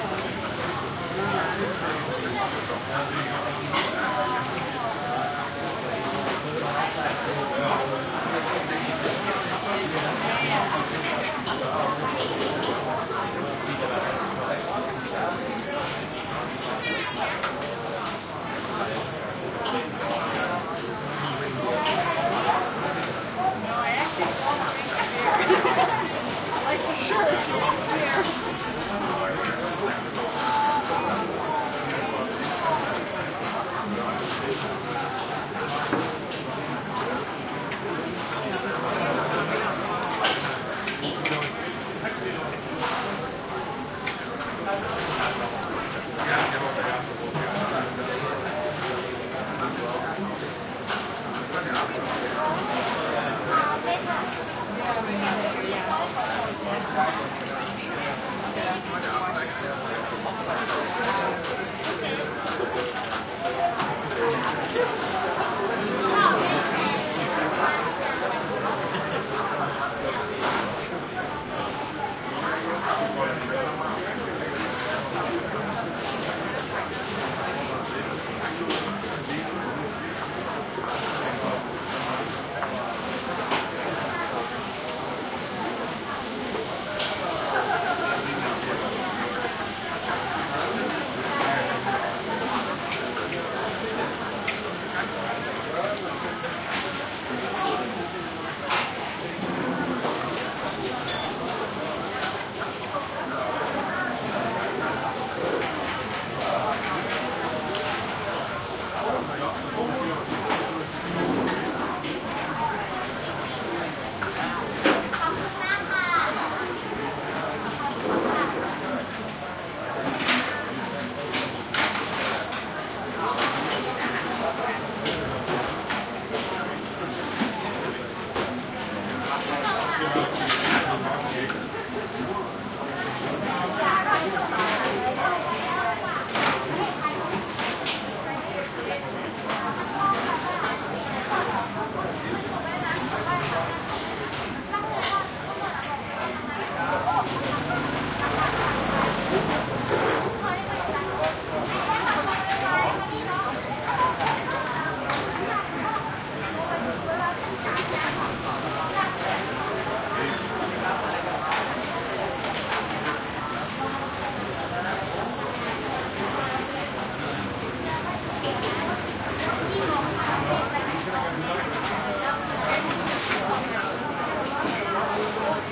Thailand Chiang Mai chinese restaurant big busy crowd tourists and locals lively walla and kitchen wok sounds bg